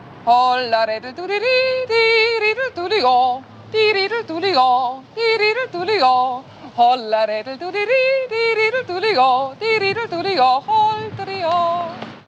A whistle of a song i want to find
sample, sound, pattern, Whistle